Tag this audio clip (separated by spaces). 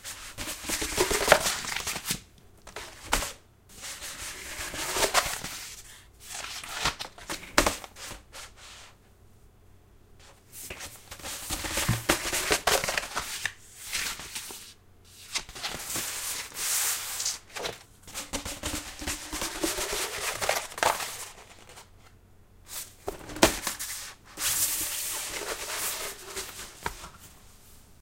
ancient-maps treasure-map thick-paper-maps rolled-paper